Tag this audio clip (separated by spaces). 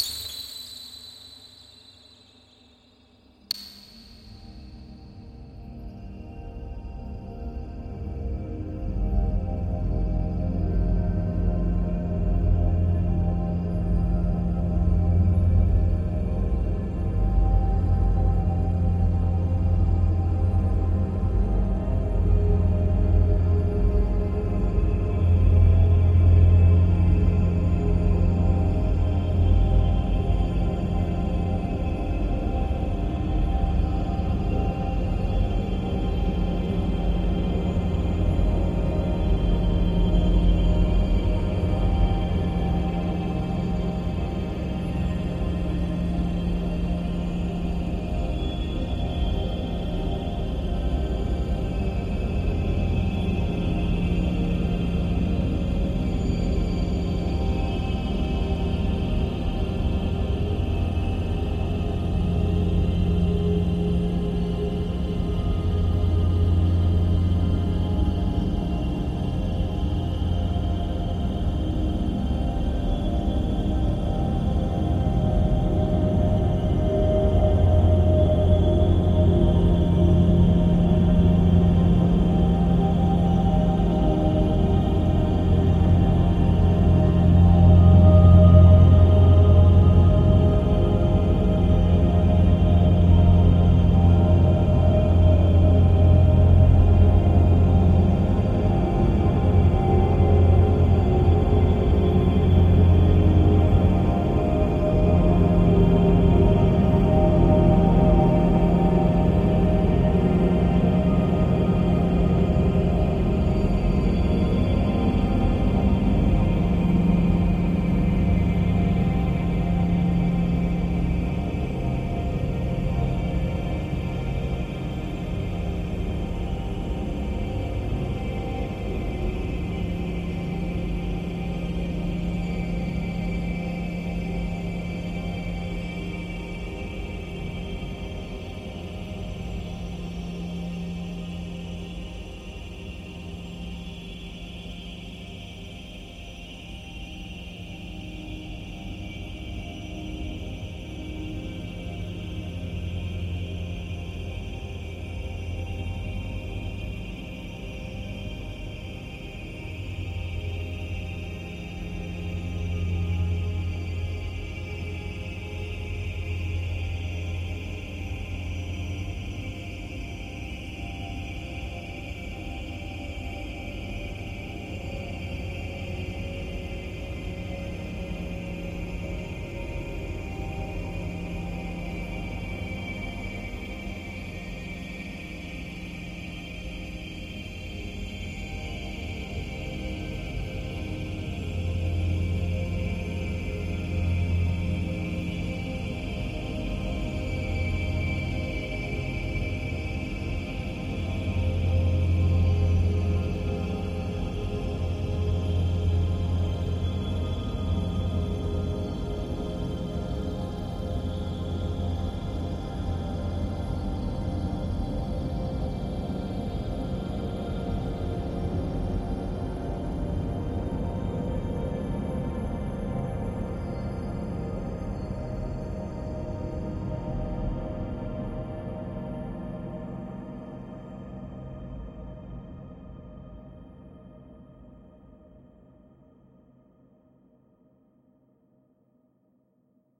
pad dreamy drone soundscape ambient multisample evolving artificial smooth divine